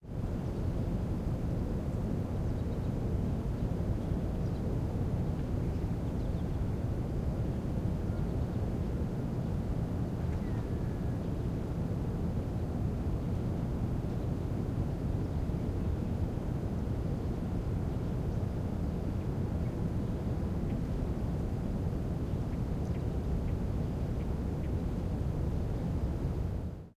Air Tone - School Yard - Dawn Empty City Air - Faint Bird Chirps
Air Tone - School Yard - Dawn Empty City Air Faint Bird Chirps
ambience, birds, dawn, soundscape, tone